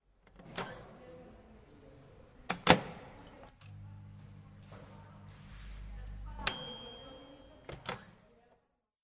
A microwave oven operating, including the sound of its door closing and the typical bell sound. Recorded with a Zoom H2. Recorded at Campus Upf cafeteria.